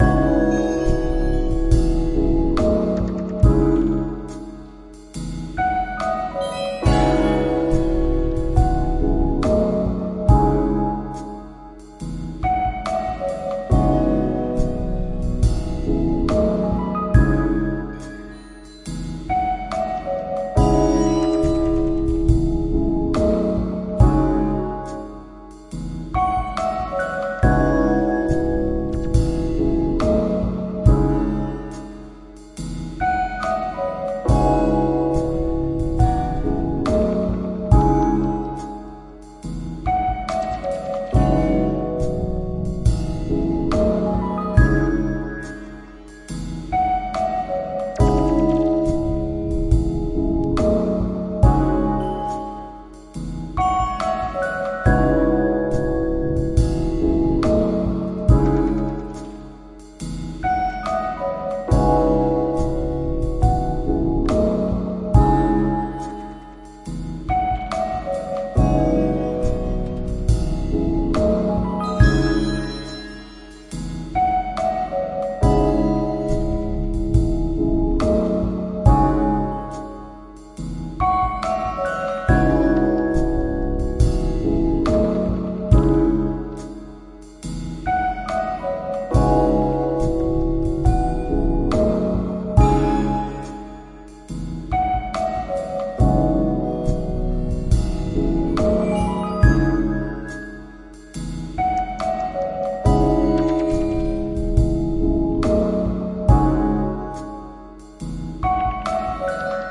Eroika remix
Seamless loop - 70bpm.
ambience, ambient, atmosphere, background, calm, chillout, jazzish, music, peaceful, relaxing, soundtrack